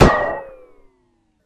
Ricochet metal4
pow, wood, metal, bang, ting, gun, ping, snap, ricochet, shoot, crack